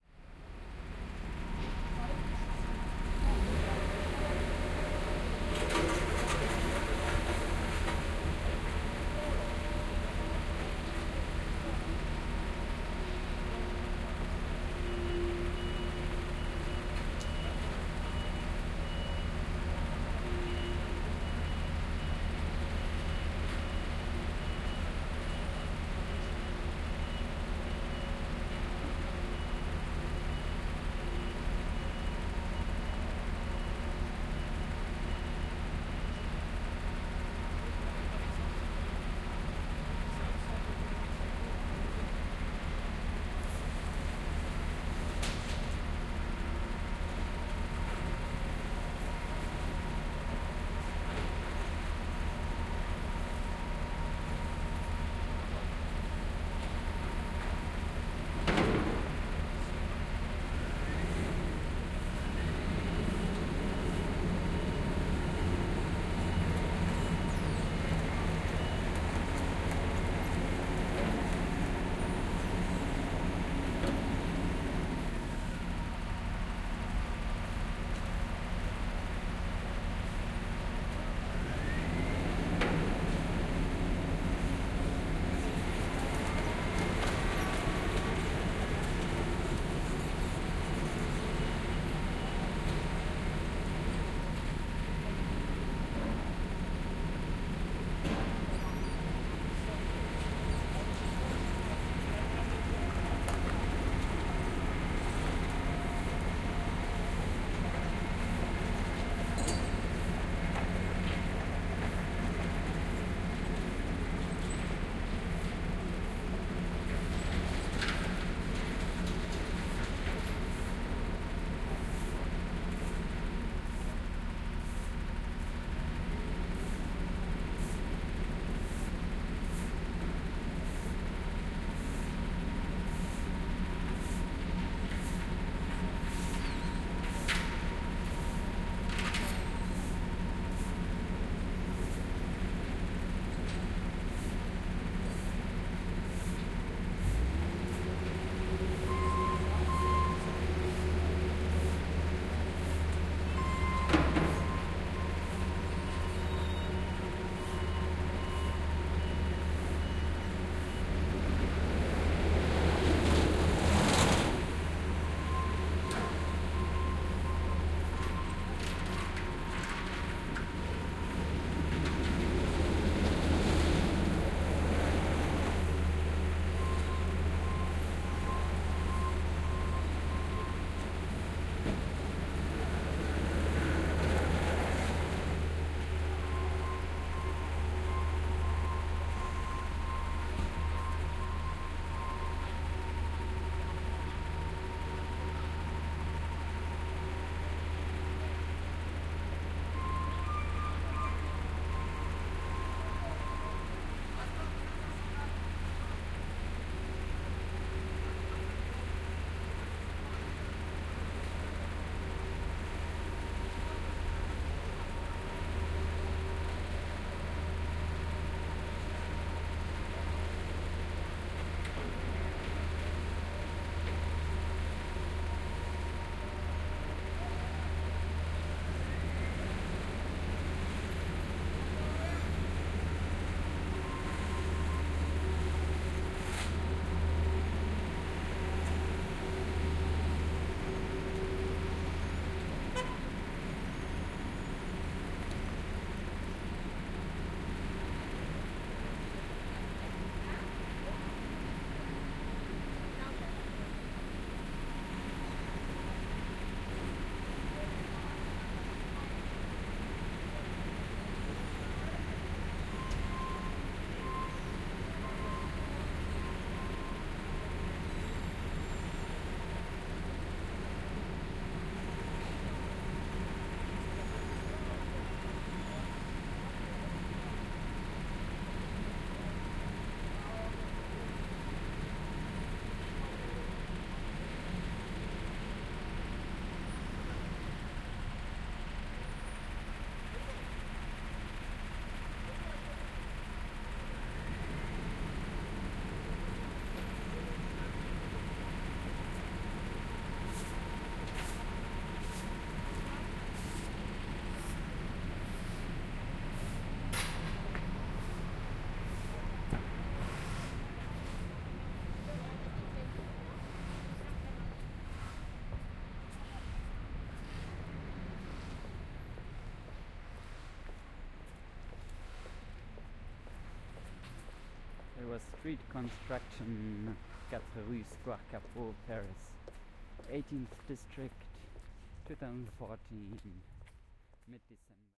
AMB Paris Street Construction Dec 2014 4pm OKM Nagra
Noisy Construction Site in a little street in Paris 18th arr.
OKM Soundman binaural recording
Ambience, City, Construction, Machine, Noise, Paris, Street, Traffic, Truck, Trucks